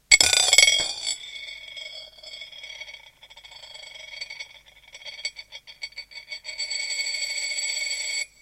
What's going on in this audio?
rotqtion1dime
From a group of rotating coins. All are set to speed the same way. It's interesting to see how differently they behave.
money, vibration, coins